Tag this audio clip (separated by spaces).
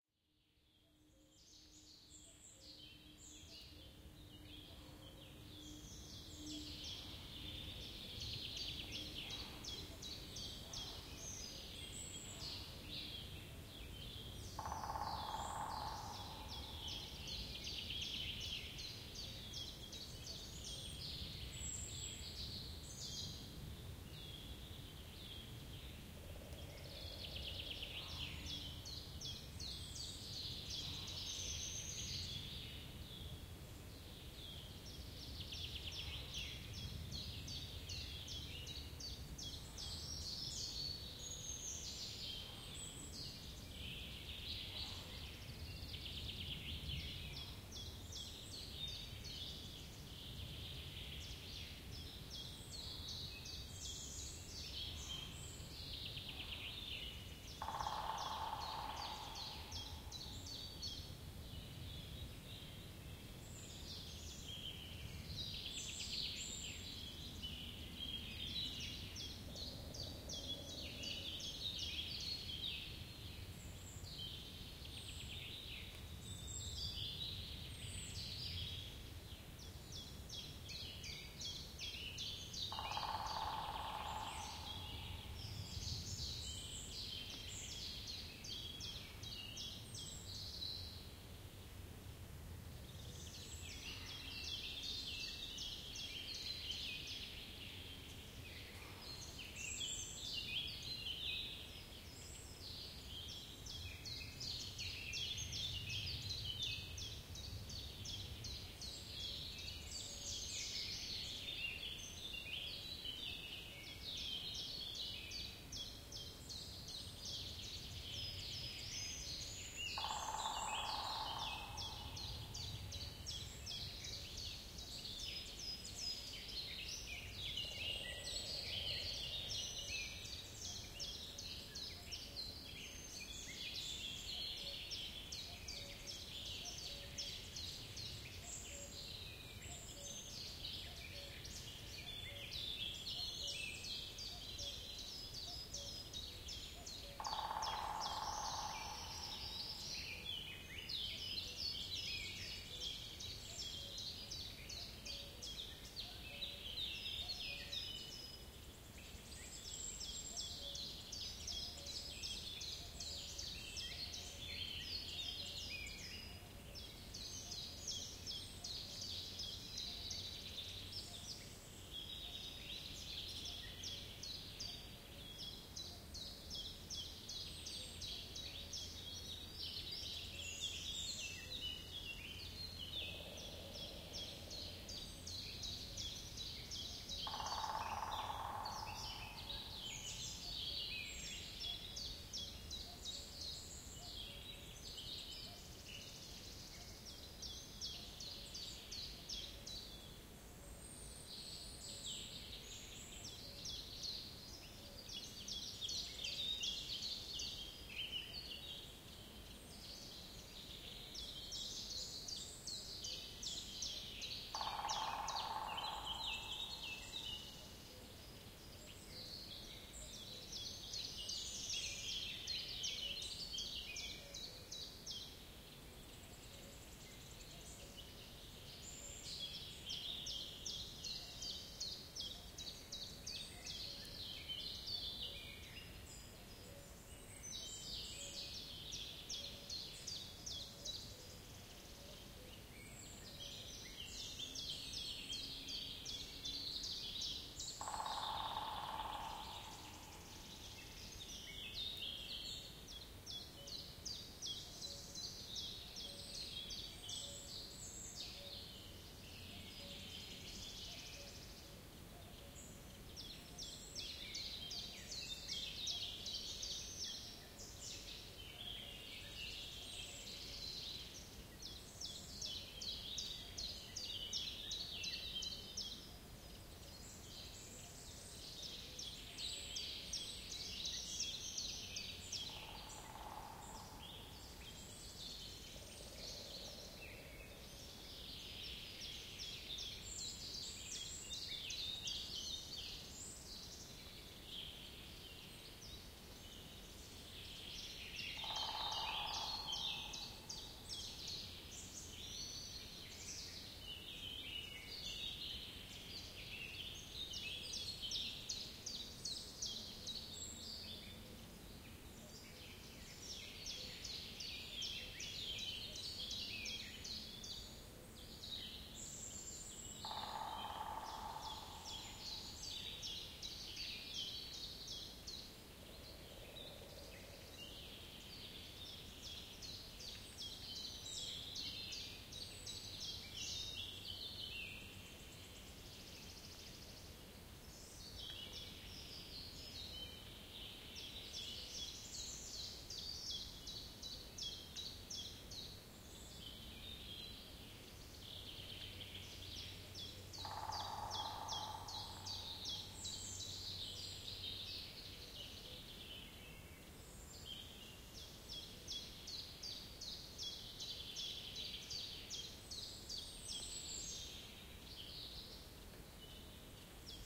forest Zab nature cuckoo summer bird